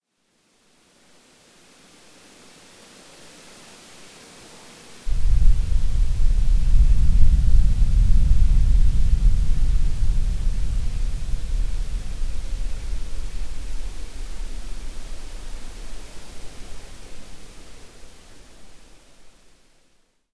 Earthquake **audible only with appropriate speakers**
This is an example of a low-frequency sound often recorded through the hydrophone on the MARS cabled observatory - an earthquake. This magnitude 3.8 earthquake occurred on July 18, 2016 at 05:53:31 UTC (USGS data). It was part of a small earthquake swarm along the San Andreas Fault, approximately 50 miles east of the hydrophone. All quakes in this swarm, with magnitudes as low as 1.9, were detected by the hydrophone. We have recorded as many as 40 earthquakes within a single day. The original recording was normalized, and speed was increased 5X to make the low frequency sound more audible. Still, subwoofer or headphones are recommended.